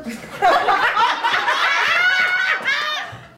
Audience laughing50
Audience in a small revue theatre in Vienna, Austria. Recorded with consumer video camera.
applauding, applause, audience, cheering, clapping, crowd, group, laughing